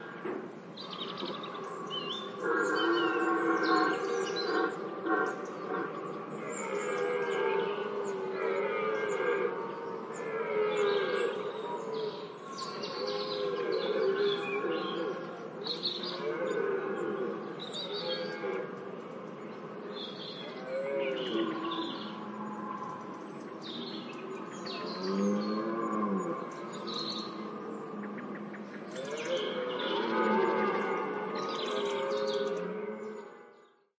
Deer rut - Brame Cerf 02
Deer rut - Brame Cerf
OKM II binaural capsules
ZoomH5
Senheiser MKE600
nature, deer, animals, forest, cerf, field-recording